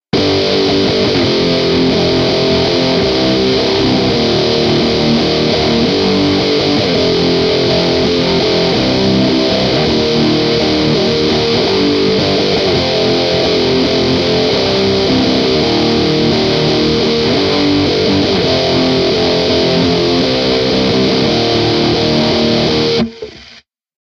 Rock Riff
First guitar loop I've put on here. Hooray. I created it using a built-in line input to my MacBook, and recorded it using GarageBand.
Guitar, Distorted